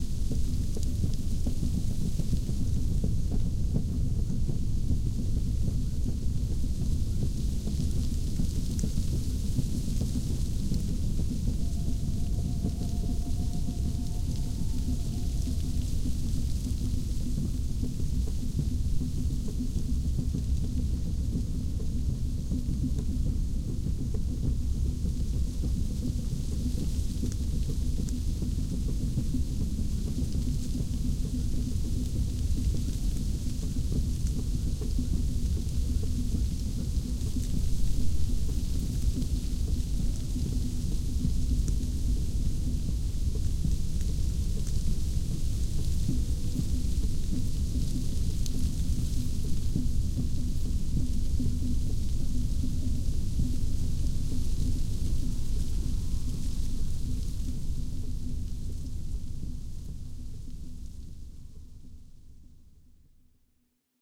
I used this in a play for which I was doing sound design. It's meant to sound like a forest at night with a tribal village not far. It's the setting for a wolf hunting. Awesome sounds, big thanks to you all!
night, forest, village